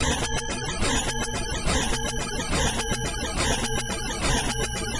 Loopable image synth element.